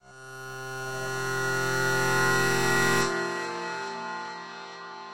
Scrapie tension string from a Virus
effect, film, movie, string, tension